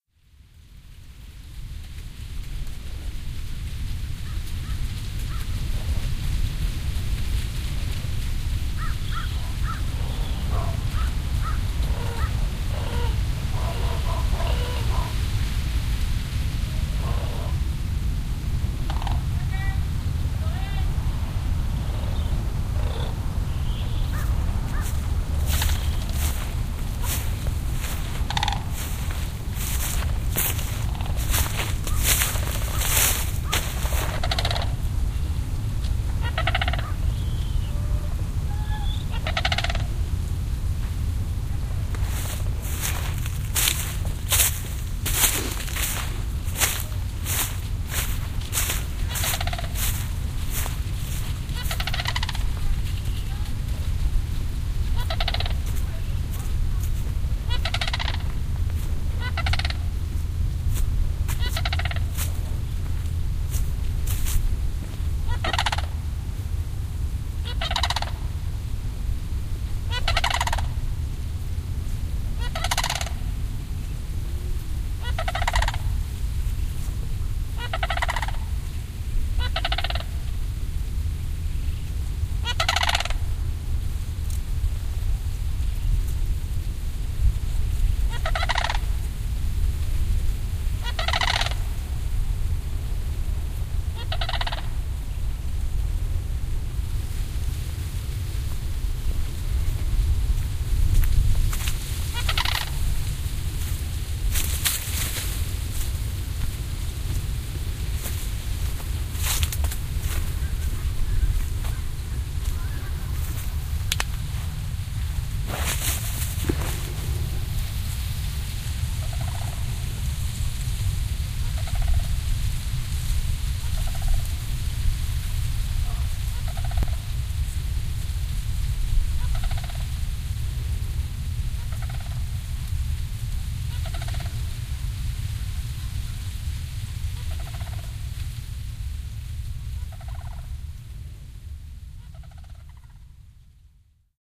this is the sound of a flock of sandhill cranes in a field in albuquerque, new mexico. other sounds include: dried corn stalks in the breeze and the sound of my footsteps as i walk through the field.
birds, field-recording